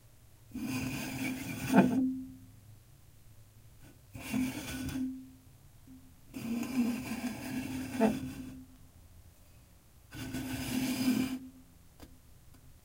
full soda can slid across table. Four instances. Recorded with a Sony ECM-99 stereo microphone to SonyMD.